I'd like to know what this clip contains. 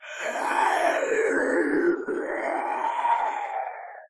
Zombie Growl (Short)
This is a copy of my first uploaded sound, "Zombie Growl" except this version gets rid of the gasp or moan or whatever you wanna call it from the beginning of the track.
beast, creature, creepy, dead, evil, gasp, gasping, ghoul, groan, growl, growling, horror, moan, monster, roar, scary, snarl, snarling, undead, voice, zombie